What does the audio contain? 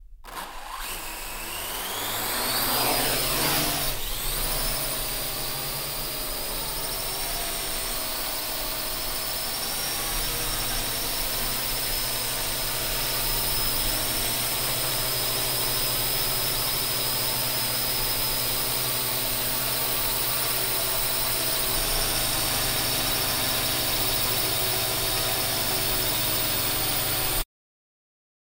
FXLM drone quadrocopter flying close T01 ssg
Quadrocopter recorded in a TV studio. Sennheiser MKH416 into Zoom H6.